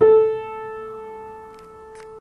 piano note regular A
a, piano, regular